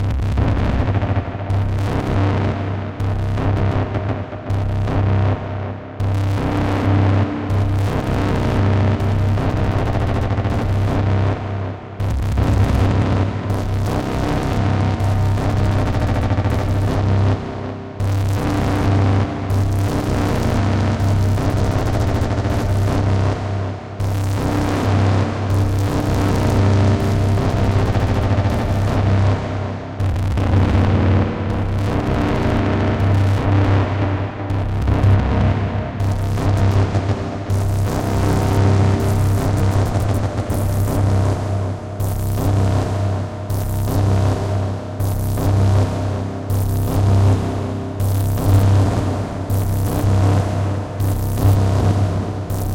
Electronic Tension Buildup (battlefield 3 inspired)
Created for suspense and tension
Ableton, battlefield-3, computer, digital, distortion, electronic, filters, generated, glitch, repeat, rhythm, suspension, tension